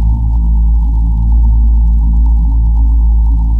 Sci Fi Drone Engine Loop
Sci Fi drone engine seamless loop. A constant pace travelling through hyper space. Quite eerie and machine like with a hint of electronics doing some multi threaded work.